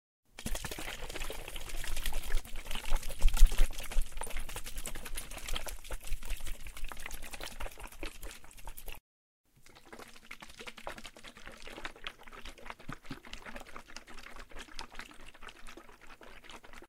More sounds of me shaking a bottle of water.